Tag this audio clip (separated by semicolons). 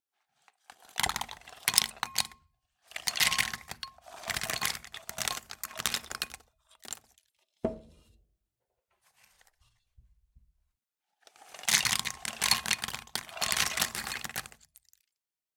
onesoundperday2018; peanuts